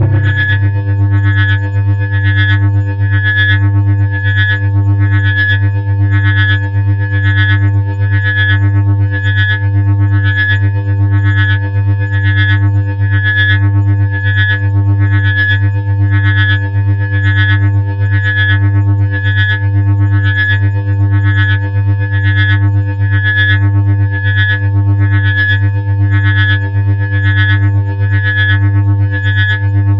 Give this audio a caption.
Drone Hover/Mining
drone, drone-mine, drone-mining